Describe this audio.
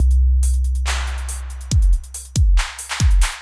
On Road loop4
Has a style closest to dub step/hip hop. 140 bpm. Can be used with On Road loop3
140-bpm, 8-bar, On-road